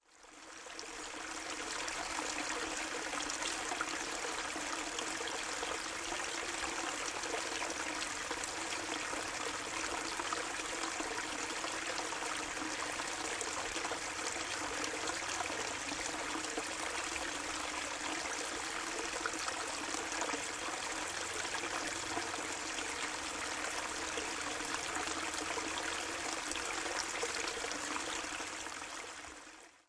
Trickling Water 5
Field-recording of water slowly trickling down a stream with some rapids nearby.
Recorded in Springbrook National Park, Queensland using the Zoom H6 Mid-side module.
liquid, dripping, gurgle, stream, trickling, trickle, river, flowing, flow, brook, water, field-recording, creek